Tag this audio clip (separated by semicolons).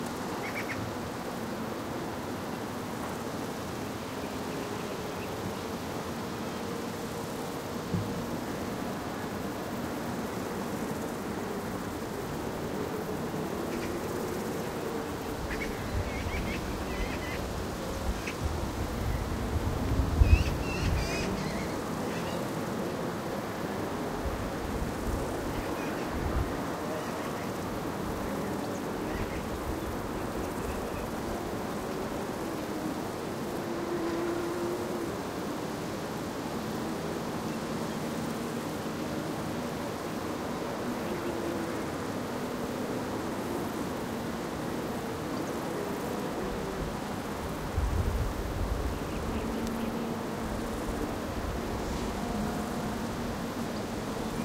Omsk; Russia; athmosphere; noise; park; victory-park